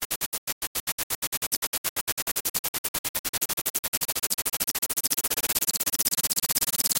Metallic sound first granulated, then combfiltered, then waveshaped. Very resonant.
comb, grain, metal, resonance, waveshape